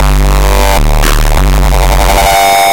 Basic Street Beat
drums, hop, broken, ambient, processed, distorted, rythm, trip